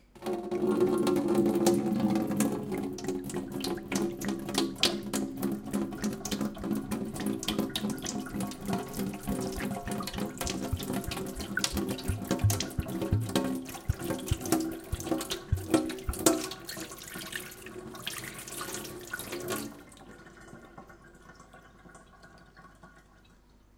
water falling to the sink /agua cayendo en el fregadero
sink, faucet, kitchen